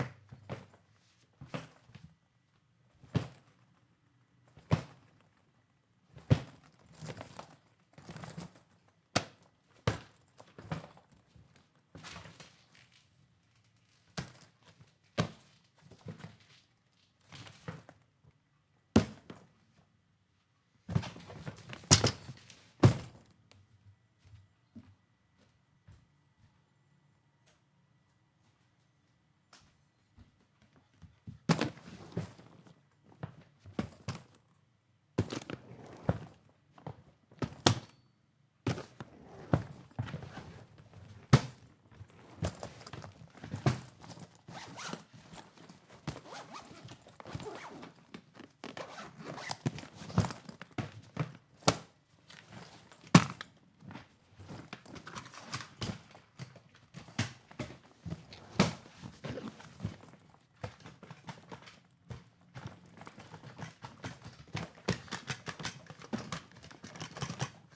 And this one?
Luggage Movement Foley
Handling sounds of a suitcase
suitcase, movement, foley, handling, moving, luggage